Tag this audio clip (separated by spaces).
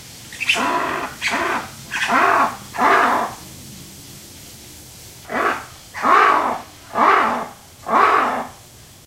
field-recording lemur madagascar monkey primates zoo